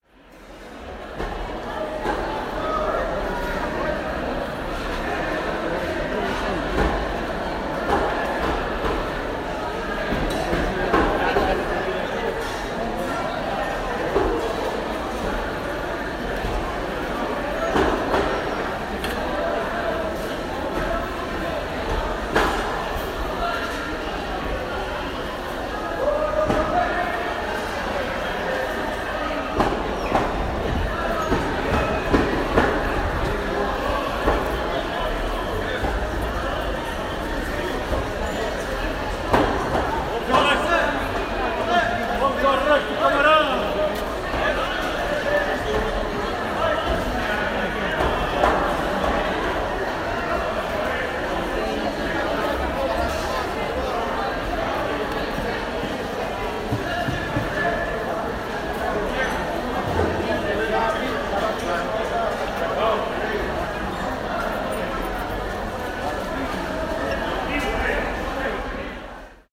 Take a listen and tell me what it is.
Fish Market in Olhão Portugal
At saturday the fish market in Olhão gets very busy and noisy. Excelent for hanging a mic and record some ambient noise.